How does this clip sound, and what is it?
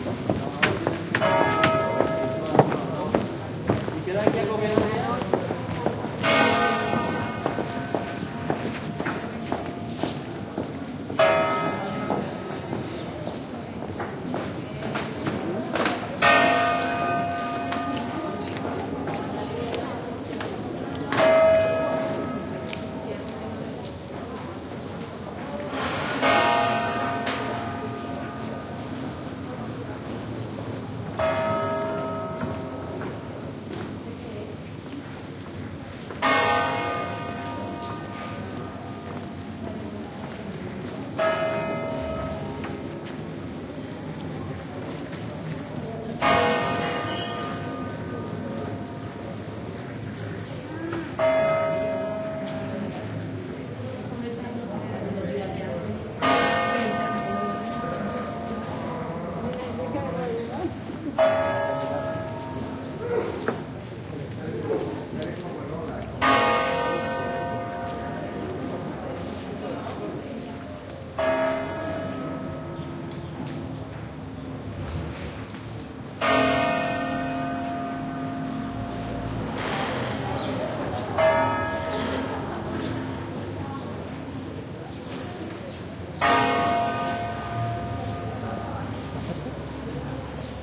20110220 street.churchbell.01
people walking and talking, and slow pealing from church bells in background. Recorded in the surroundings of the Cordoba (S Spain) cathedral with PCM M10 recorder internal mics